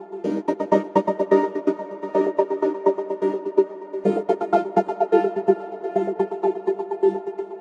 126 mellow stutter 2
electronic, music, rhythm, loop, synth